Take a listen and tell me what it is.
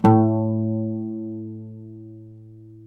Oud sample originally from Hammondman. Slightly reduced in amplitude to permit DSP experiments that require more headroom.
string, A2, Oud, sample, short